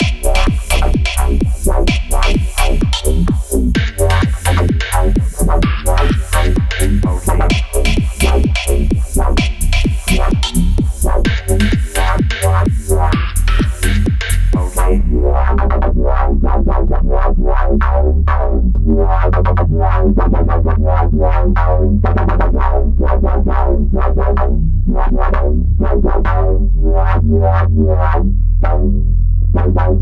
A drum loop with bass and a bass line separate. Made in FL Studio.
You Don't have to, but its the most you could do :)